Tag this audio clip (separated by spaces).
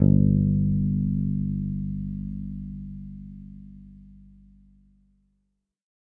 bass electric guitar multisample